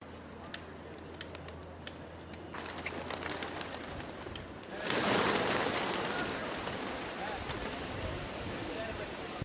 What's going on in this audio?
(suddenly) flying pigeons in Istanbul
A short recording of a group of piegons that start to fly. Working people can be heard. Recorded for a soundscape project.
birds, flying